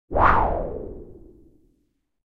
Woosh High 02
White noise soundeffect from my Wooshes Pack. Useful for motion graphic animations.
effect, fly, future, fx, noise, scifi, sfx, soundeffect, space, swash, swish, swoosh, swosh, transition, wave, whoosh, wind, wish, woosh